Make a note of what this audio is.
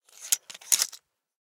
slide big 4
Studio recordings of handling a small metal mechanical device for foley purposes.
Originally used to foley handling sounds of a tattoo machine, but could also be used for guns, surgical instruments etc.
Recorded with an AT-4047/SV large-diaphragm condenser mic.
In this clip, I am sliding back an adjustment screw across a groove while holding a little container of bolts, making the sound a little more massive.
clip, foley, gun, handling, machine, mechanical, metal, slide, small